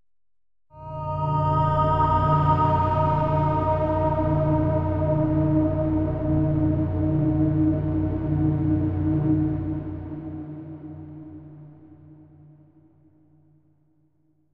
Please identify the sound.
A human voice over a deep rumbling string chord. A low boom - part of my Strange and Sci-fi pack which aims to provide sounds for use as backgrounds to music, film, animation, or even games.
ambience, atmosphere, blast, boom, city, dark, drum, electro, music, percussion, processed, rumble, sci-fi, space, synth